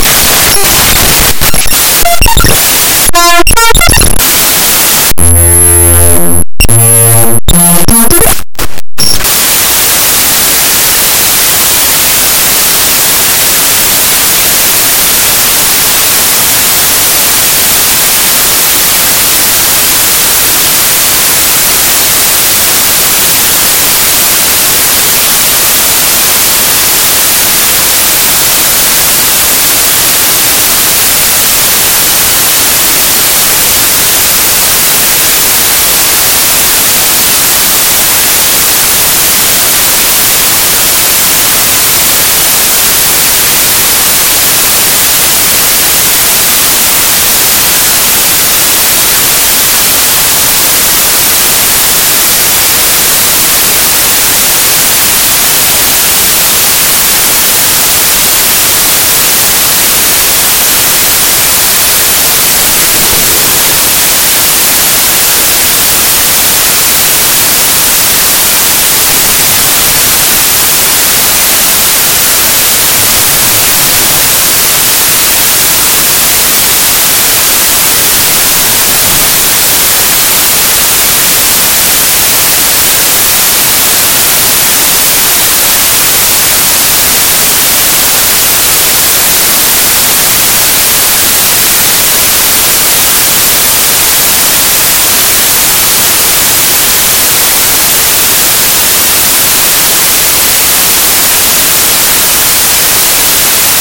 Raw Data 8
Various computer programs, images and dll/exe files opened as Raw Data in Audacity.